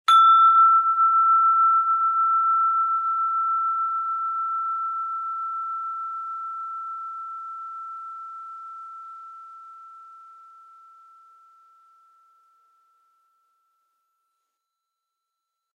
barn chime3
Individual wind chime sound
Recorded on Zoom H4n
windchimes, magical, bells, chimes